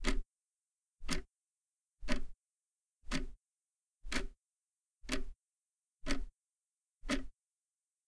Raw audio of eight ticks of a small clock. The space in between each tick has been edited out using Audacity.
An example of how you might credit is by putting this in the description/credits:
The sound was recorded using a "H1 Zoom recorder" on 17th April 2016.